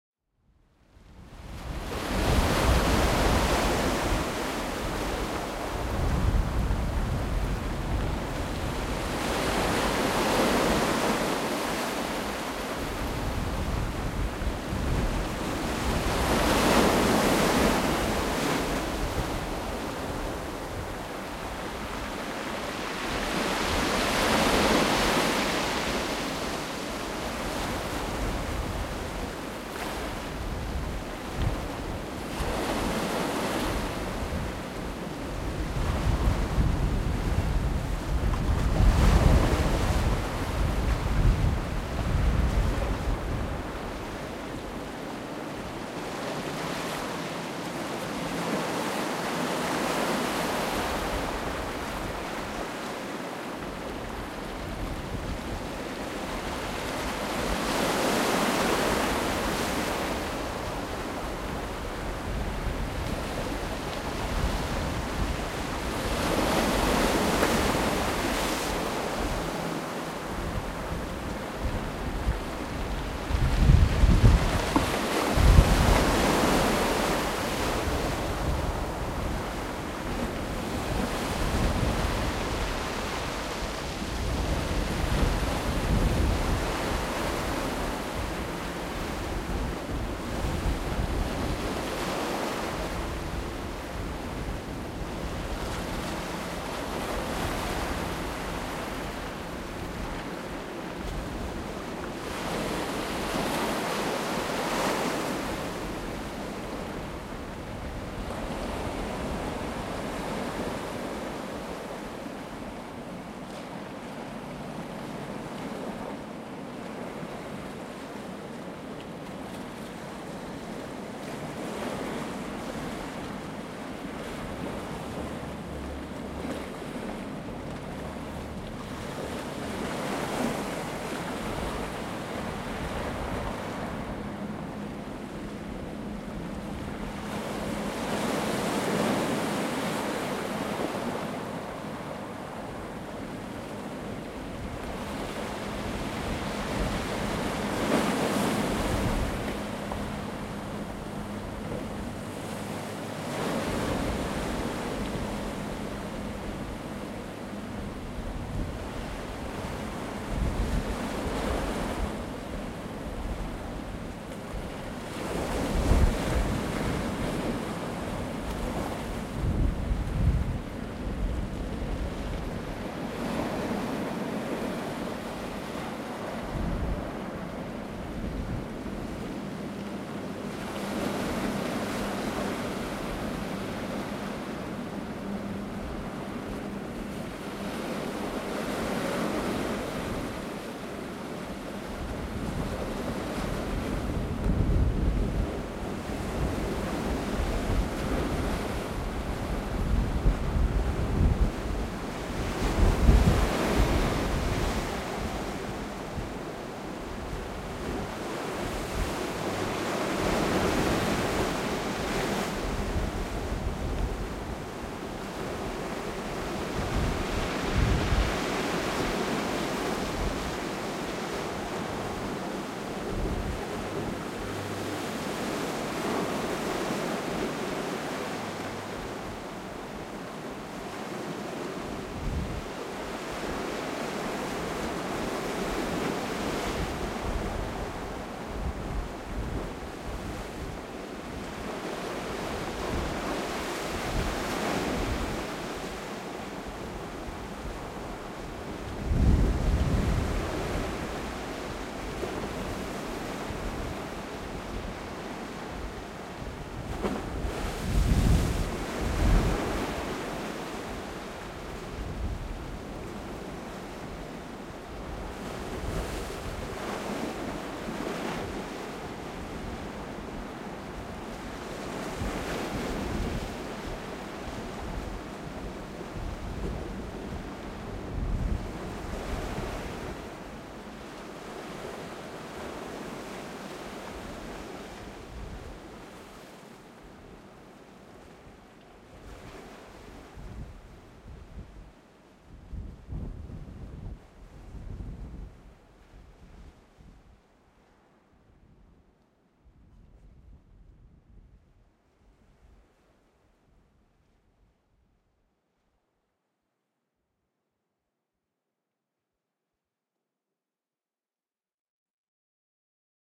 Sea recorded from Tonnara platform
date: 2011, 08th Dec.
time: 10:30 AM
gear: Zoom H4 | Rycote Windjammer
place: Tonnara Florio (Favignana - Trapani, Italy)
description: Recording made during the Ixem festival 2011 in Favignana island (Trapani, Sicily). This shot was taken in 'Tonnara Florio' from the platform onto the sea, where fishermen hitched the net to catch tunas, between the two islands (Favignana and Levanzo). You can hear the waves of a rough sea and the constant presence of wind (I wanted to leave that one as it is very similar to the filtering of the ear)
Tonnara, Favignana, waves-crashing, wind, sea, Trapani